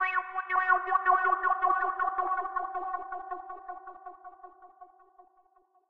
Sound FX2
Wah FX sound synthetized.
sound, wah, additional, FX, hit, fundal, effect, ambiance, synth